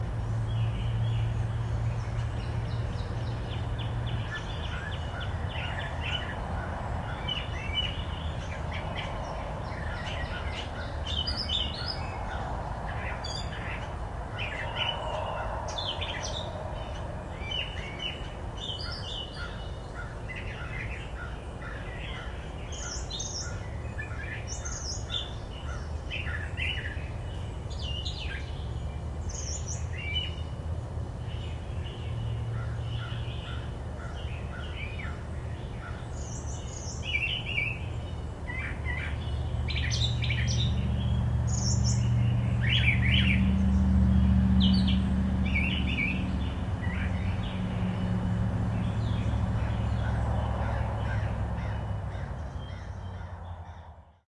Birds & Cars
This was recorded in my backyard which is connected to a main road. The birds seemed to be everywhere that day so i decided to record it. you can hear cars passing by as well. Recorded on my Sony hand held voice recorder. Unedited.
evening, city, variety, nature, cars, birds